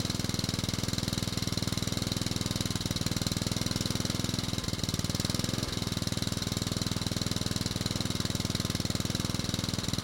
Mower loop mid speed
engine, lawnmower, motor, startup, mower, cutter, lawn